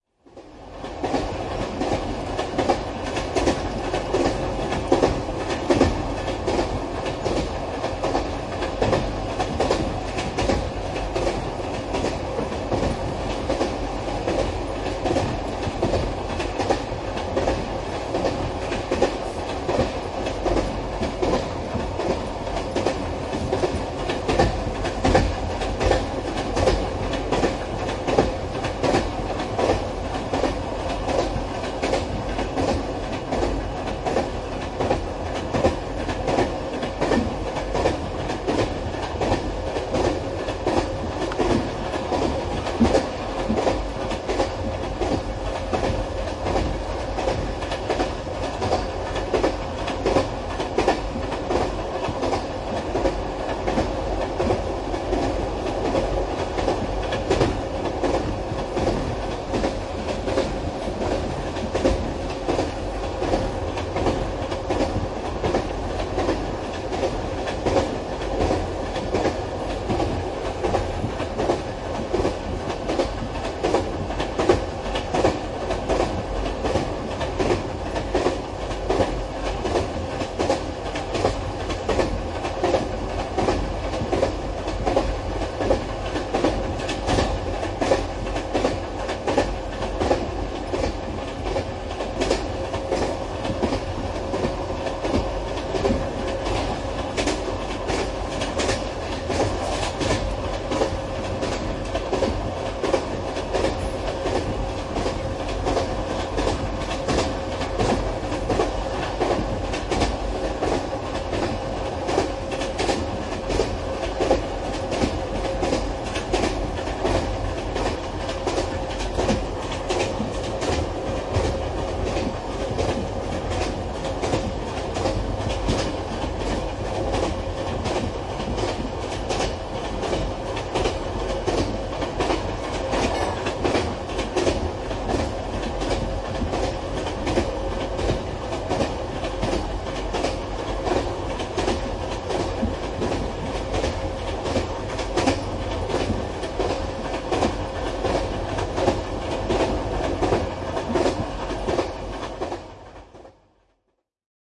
Juna, kulkua, kiskot / Train on the run, rails clatter rhytmically, interior
Kulkua vaunussa, kiskojen rytmikäs kolke. Sisä.
Paikka/Place: Suomi / Finland
Aika/Date: 22.05.1978
Clatter, Field-Recording, Finland, Finnish-Broadcasting-Company, Interior, Joukkoliikenne, Juna, Kiskot, Kolke, Kulku, Matka, Rails, Railway, Rautatie, Rhytmic, Run, Soundfx, Suomi, Tehosteet, Train, Travel, Vaunu, Yle, Yleisradio